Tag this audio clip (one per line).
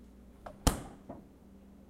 closing
fridge
kitchen